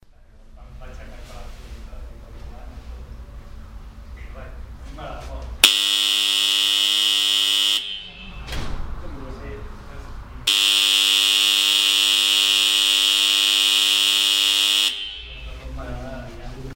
AboutTheSchools DP ES school bell
barcelona, bell, Doctor-Puigvert, ring, ringing, school, sonsdebarcelona, spain